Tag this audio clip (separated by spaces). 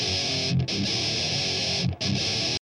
groove guitar heavy metal rock thrash